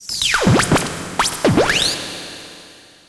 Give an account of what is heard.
ELECTRIBE SQUELTCH 2
A squeltchy sound I made on my Korg Electribe SX. it is actually a drum sample run through various fx
electribe, electro, fx, squeltchy, sx